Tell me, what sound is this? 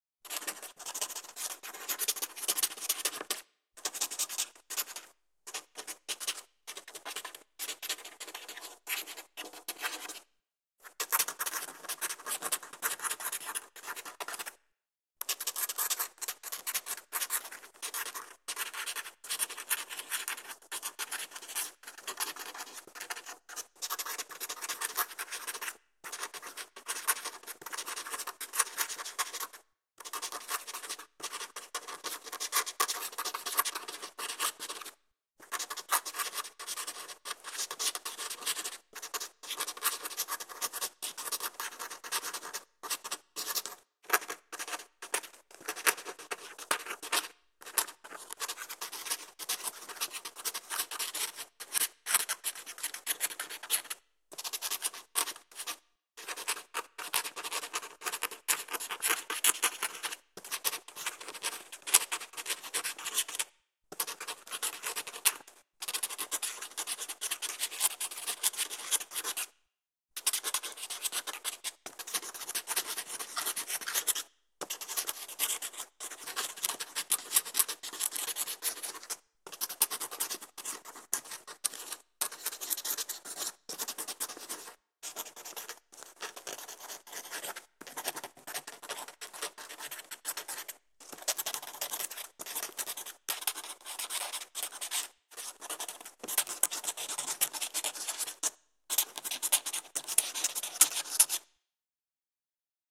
psaní brkem 02
A guill writing on paper.